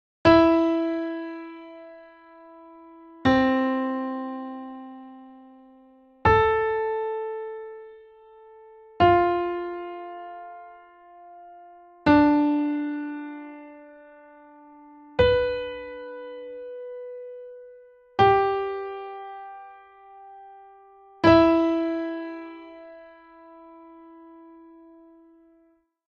E Minor Phrygian Stacked thirds